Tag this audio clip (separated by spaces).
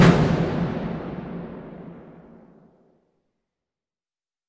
percussive metal hit drum industrial field-recording